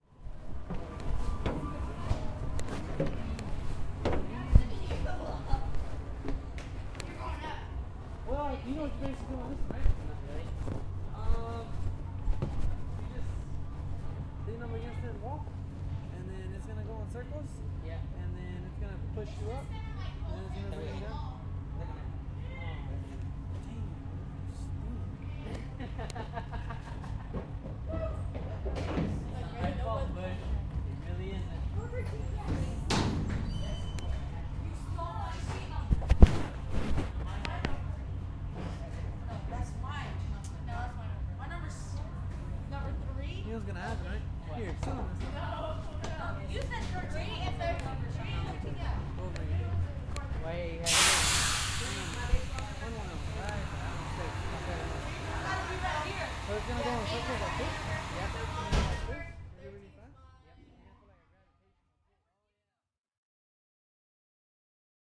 Spaceshuttle boarding, door closes
The okeechobee county fair. I board the space ship, and the door closes. Field recording, via plextalk ptp1 internal microphones.
compression, door, engine, dizzy, engines, music, air-compressor, people